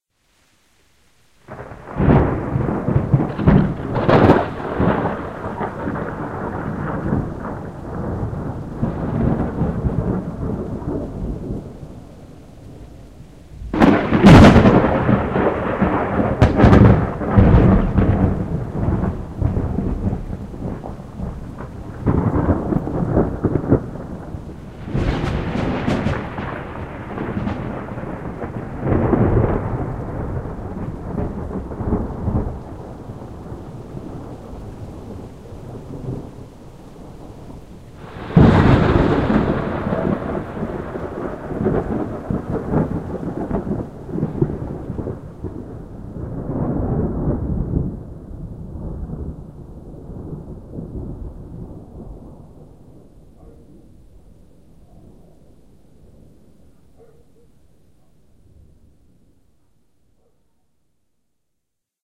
3 Hoar's thunders
no-rain, hoar, thunder